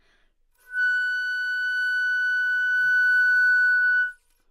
Part of the Good-sounds dataset of monophonic instrumental sounds.
instrument::flute
note::Fsharp
octave::5
midi note::66
good-sounds-id::142
dynamic_level::p